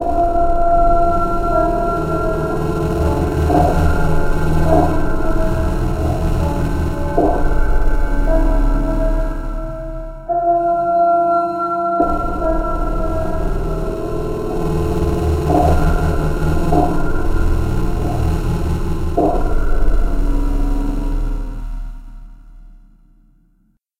STM2 intro 3loop c
bass, metallic, static, drone, sqeal, distortion